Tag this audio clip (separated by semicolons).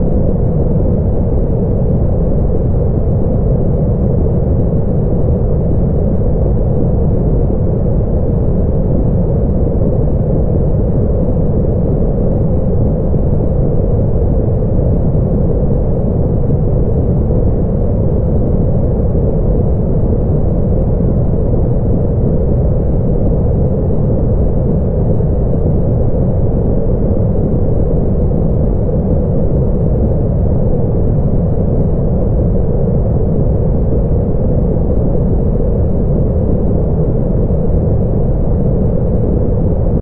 winds; blow; rumble; high; whoosh; ambiance